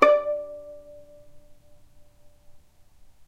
violin pizz vib D4
violin pizzicato vibrato